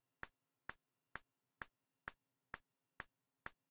FRT CH 6130
Hi-Hat modular morph
Hi-Hat, modular